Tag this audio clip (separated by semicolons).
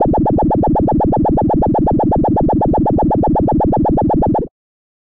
bubble; gun